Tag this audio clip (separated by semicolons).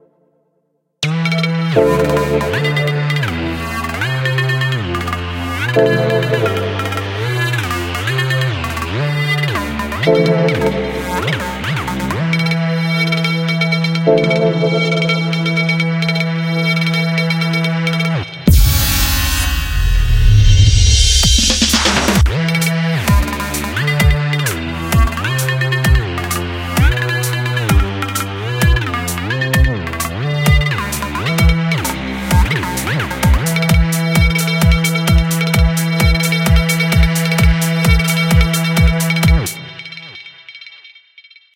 bgm; fast; intro; music; theme